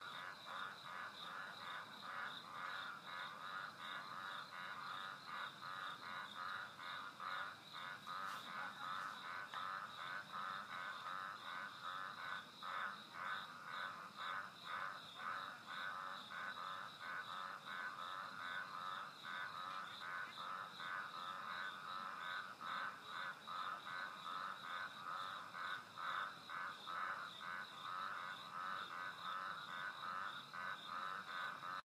Pilanesberg At Night 2
Recorded on iPhone at night in Pilanesberg, South Africa in late spring. Frogs and maybe some insect sounds.
ambient, field-recording, frogs, nature, spring